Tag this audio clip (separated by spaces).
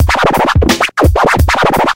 scratch style turntable